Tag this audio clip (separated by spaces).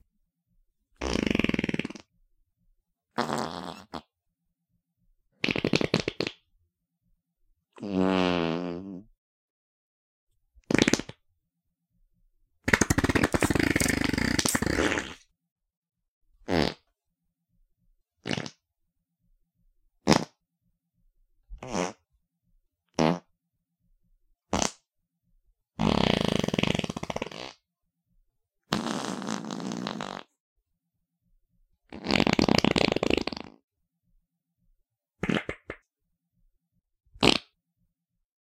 fart gas farts flatulate poot farting flatulation